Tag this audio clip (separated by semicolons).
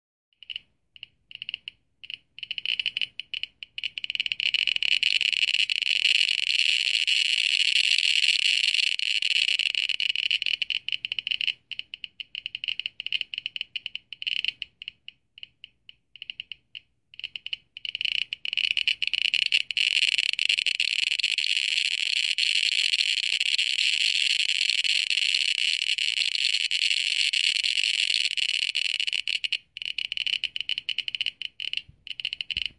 clicks; geiger; geiger-counter